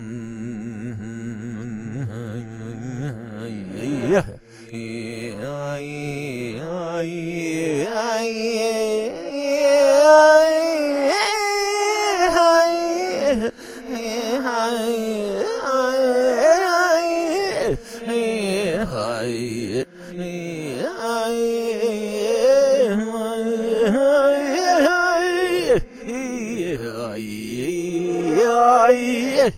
A reversed track with added reverb of a friend attempting a Native American chant from the American East.